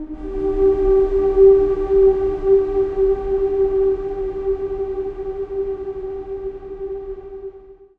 SteamPipe 5 SteamPad G#3
This sample is part of the "SteamPipe Multisample 5 SteamPad" sample
pack. It is a multisample to import into your favourite samples. A
beautiful ambient pad sound, suitable for ambient music. In the sample
pack there are 16 samples evenly spread across 5 octaves (C1 till C6).
The note in the sample name (C, E or G#) does indicate the pitch of the
sound. The sound was created with the SteamPipe V3 ensemble from the
user library of Reaktor. After that normalising and fades were applied within Cubase SX & Wavelab.